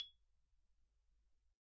Kelon Xylophone Recorded with single Neumann U-87. Very bright with sharp attack (as Kelon tends to be). Cuts through a track like a hot knife through chocolate.
kelon, mallets, samples